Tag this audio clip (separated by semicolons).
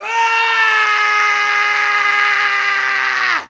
male; angry